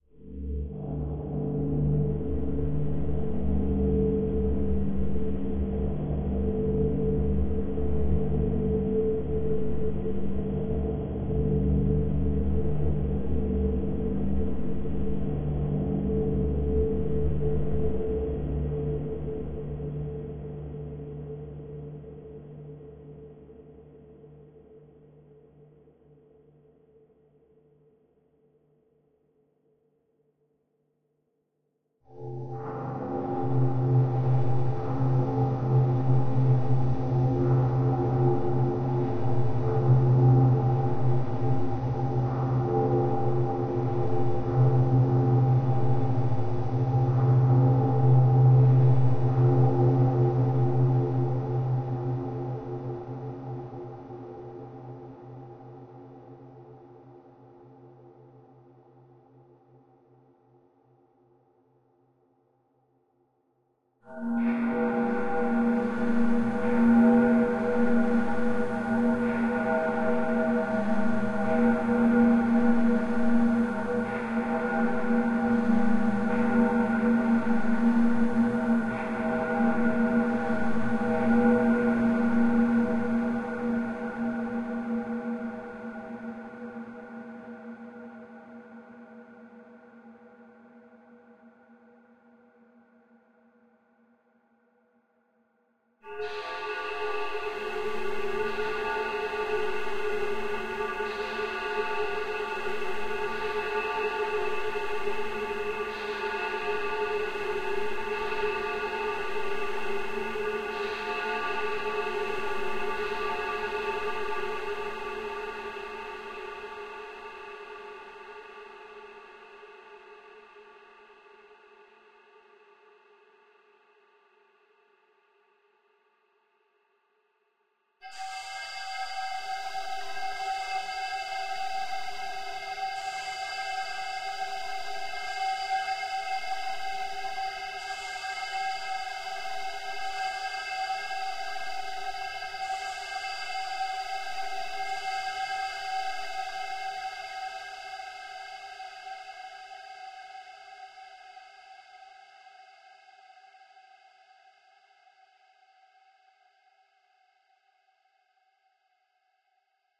Made using tweaked samples and UVI Falcon.
All notes are hit in C, Different octaves.